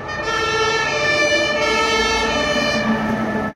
A German fire engine with sirens passes by.